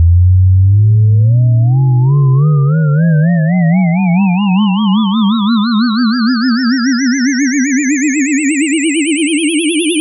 Generated with Cool Edit 96. Sounds like a UFO taking off.